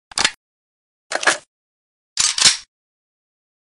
reload sound of the galil assault rifle